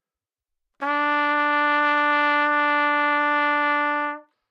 Part of the Good-sounds dataset of monophonic instrumental sounds.
instrument::trumpet
note::D
octave::4
midi note::50
good-sounds-id::2831